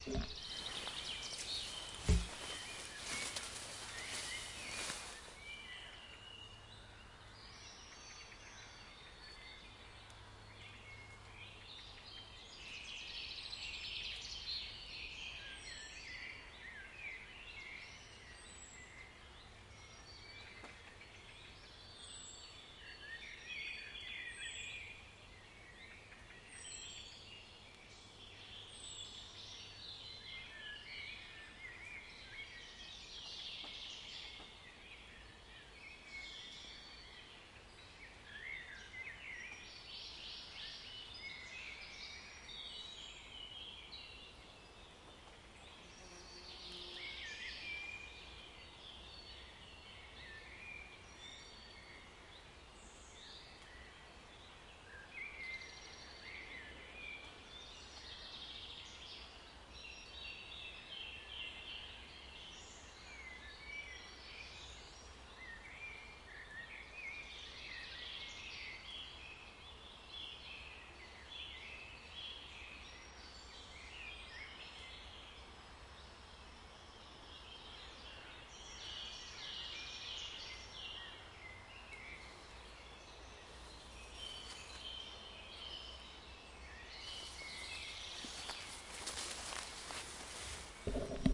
forest-birds3b
Surround sound: ambient background noise from a central european forest, mainly birds, front channels